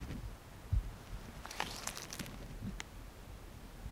LEAF-CRUNCH
Crunching of a leaf. [Zoom H1n]